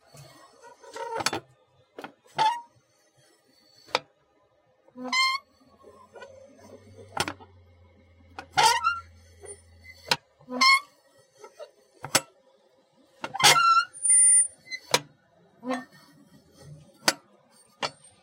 light screech
The sound of a mailbox making a screeching noise